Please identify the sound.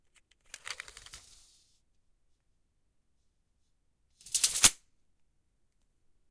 Opening and Closing Tape Measurer
recorded on a zoom h6 in a basement
measurer, sounds, tape